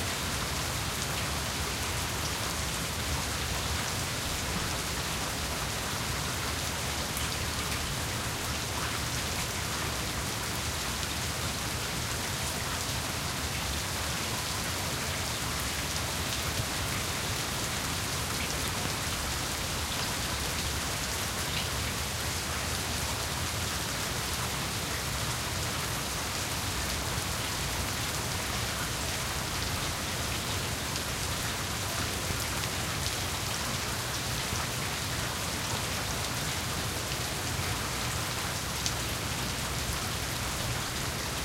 Stereo recording from multiple spots of about 8-9 tracks of rain, recorded with a Rode NT1 at various points in around my house near windows and out my garage (probably not the best idea using a condenser mic to do this but it was after I stopped recording a song due to the rain). There's too many synths and not enough ambiances and SFX here so I figured I'd contribute.
concrete, heavy-rain, oregon-rain, outdoors, rain, stereo